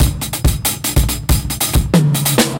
A short drum loop with a distinctive "low-fi" and "raw" feel. Could work well in a drum and bass or hip-hop project. Recorded live with a zoom H2N (line input from a soundboard).
hip-hop, groove, samples, breakbeat, loop, drum-and-bass, percussion-loop, drums, beat, drum-loop